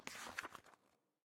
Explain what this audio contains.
Soft Cover Book Open 3
Page, Paper